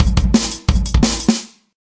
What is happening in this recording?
eardigi drums 30
This drum loop is part of a mini pack of acoustic dnb drums